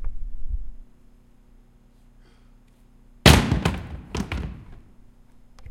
Single Thrown chair falls and hits the ground.